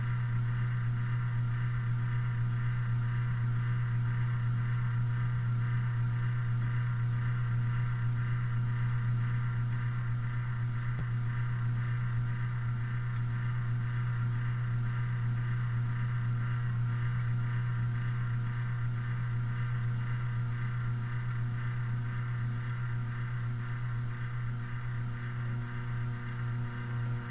Closeup of a noisy ceiling fan recorded with laptop and USB microphone in the bedroom.
hum, foley, fan, buzz, ceiling, bedroom, noises